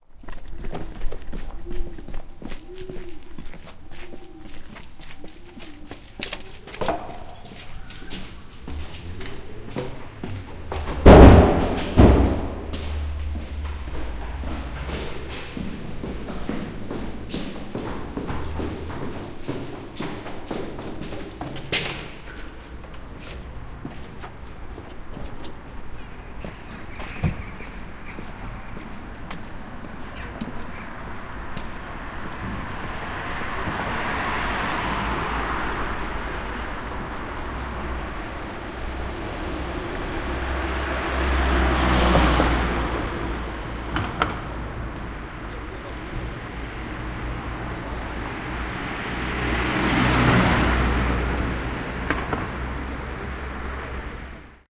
sunday wilda1 200311
20.03.2011: about.14.00. Gorna Wilda street in Wilda district in Poznan. sunday ambience: some cars, birds, almost no people. a kind of silence and laziness.